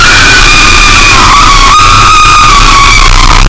Made With Audacity
Just Screeched To My Mic
death, terror, monster, horror, sinister, scream, terrifying, creepy, ghost, loud, haunted, fear, jumpscare, phantom, scary